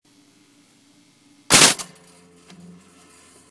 The sound of a toaster "popping".
Recorded with my mobile phone.